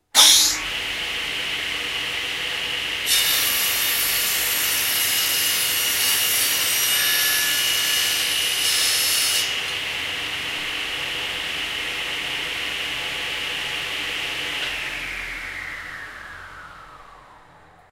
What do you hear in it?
Sawing a piece of wood on a circular saw